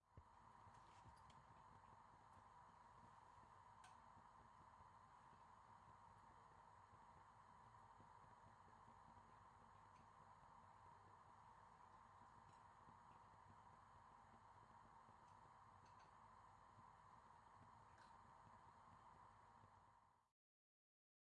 Gas stove left on
stove, flame, burner, gas